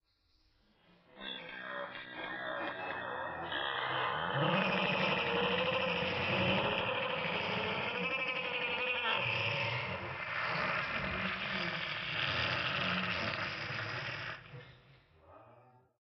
weird monster sound
my first sound ever! recorder with a cheap mono mic and post processed with adobe audition ( slowdown, pitch, etc)